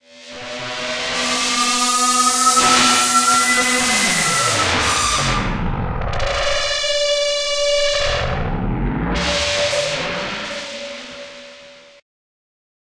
Metal Screech
Alien/banshee/soul-reaping madness here. Sound made in Reason 8.
sounddesign, loud, abstract, synthesizer, synthesized, mutant